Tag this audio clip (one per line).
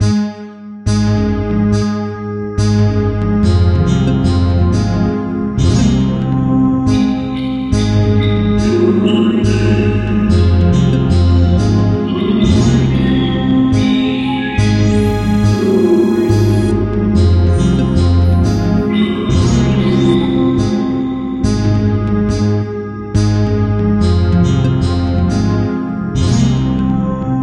Experimental
Loop